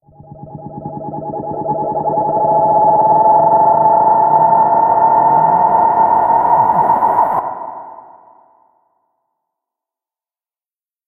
a weird uplifter i made with fruity granulizer.
i took this from my deleted sample pack called musicom's samplebox vol. 1 OK.
fx, riser, transmission, uplifter, weird